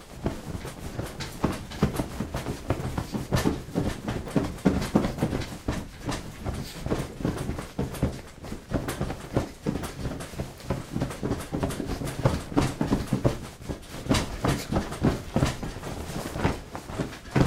Someone thrashing in a bed.